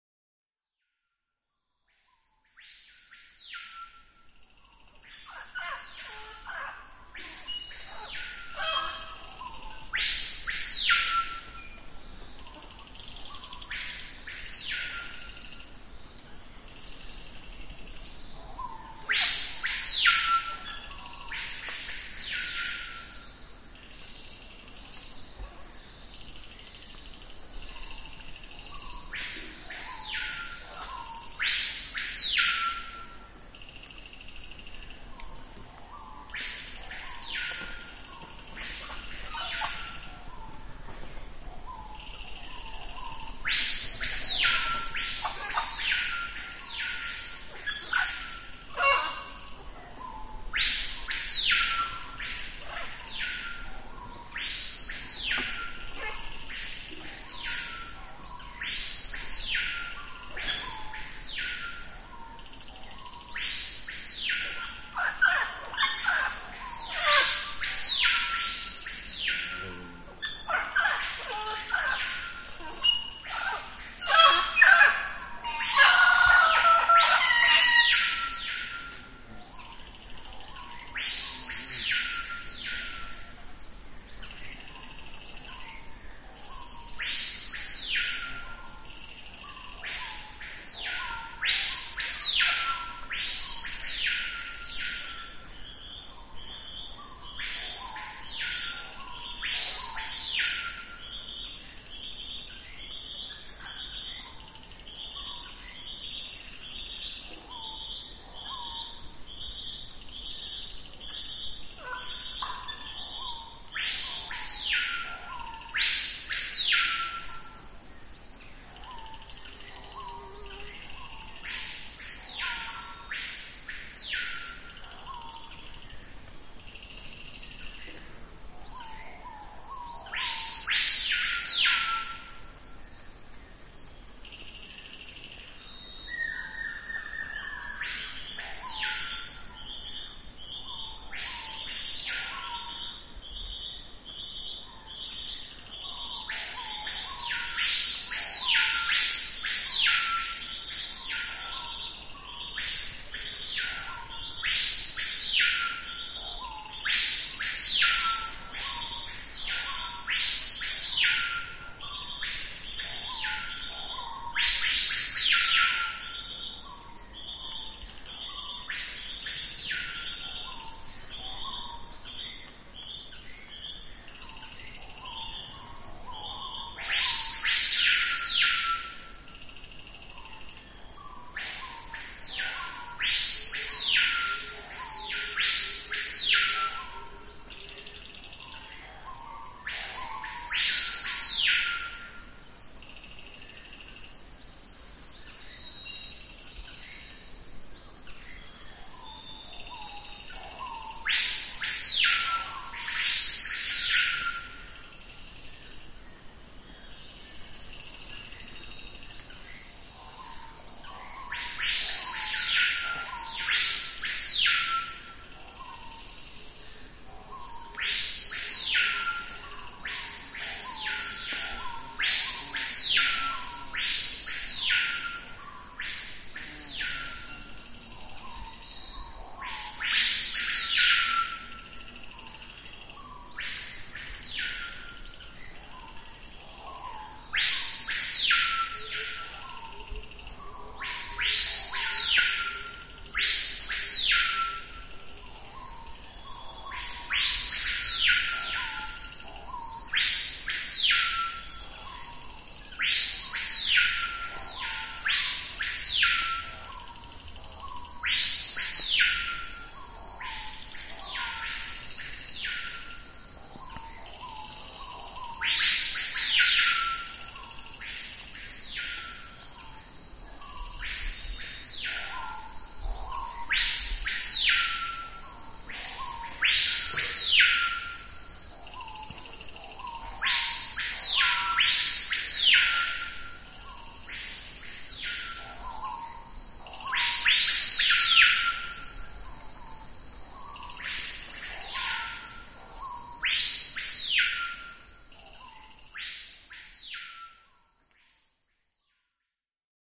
Caqueton birds in primary forest around Nainekulodge, Amacayacu, Amazon
Field recording of aqueton birds in primary forest around Nainekulodge, Amacayacu, Amazon, Colombia. Recorded with Tascam DR-05
amazon, bird, field-recording, forest, jungle, primary